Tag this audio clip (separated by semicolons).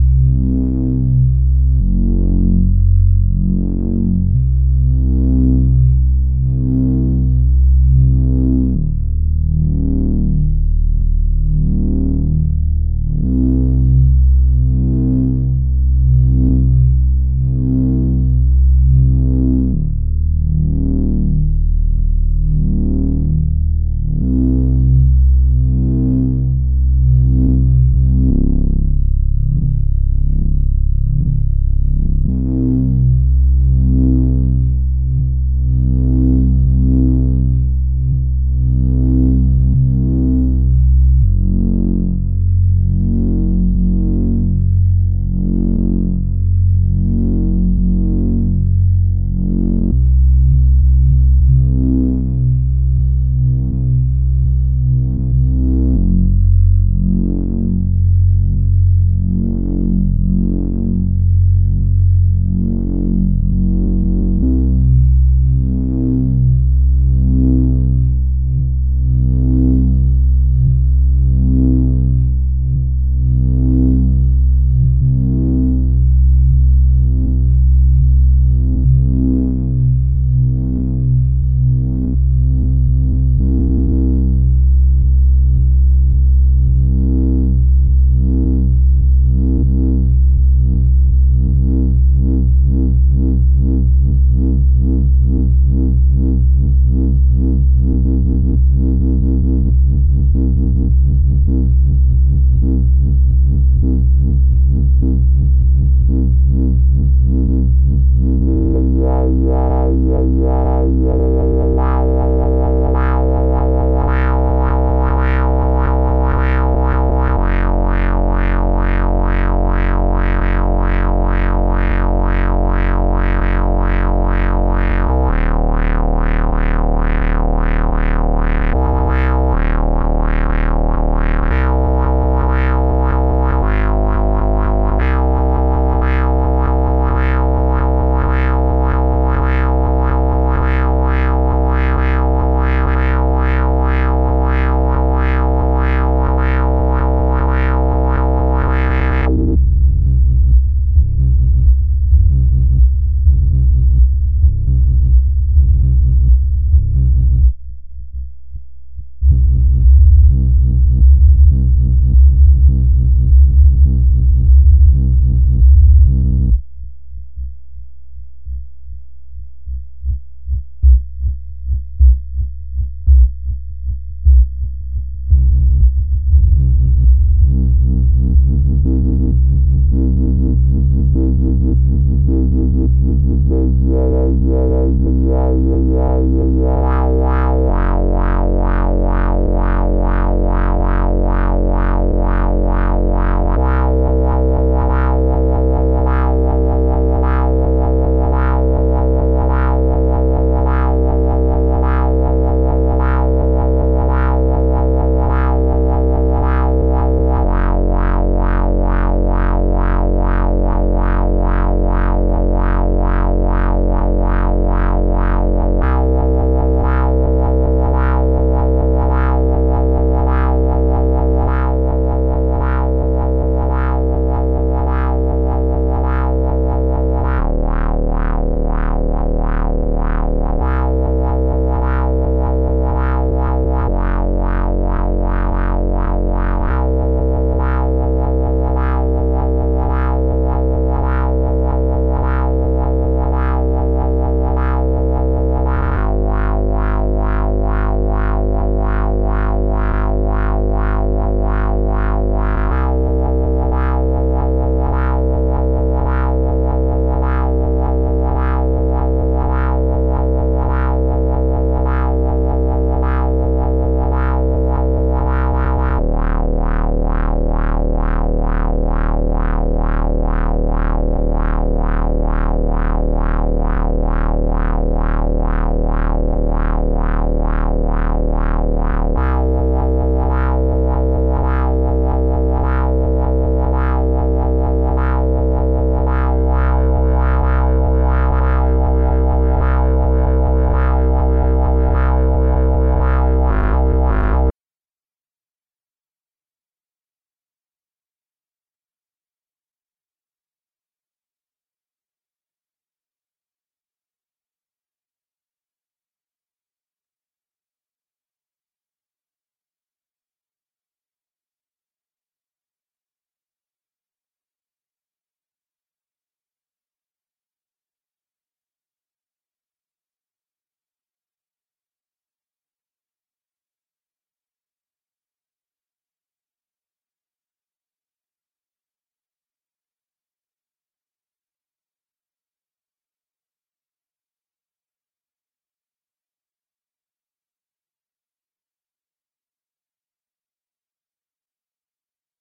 Minimoog; Pitch